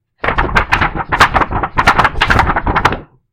Paper Flay
The flaying of a sheet of paper